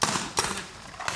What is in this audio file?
PAINTBALL SHOOT
Double shoot with Paintball Weapon.
shoot, weapon